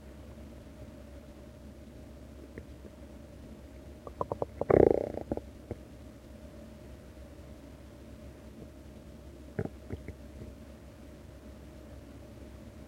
A bubbly gurgle. Writing descriptions for these is hard.
bubble, guts, human, stomach